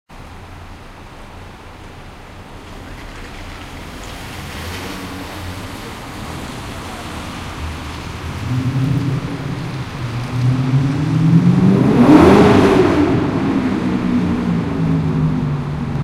Berlin Street short car motor atmo
Car and motor.
Berlin, atmo, car, field-recording, motor, street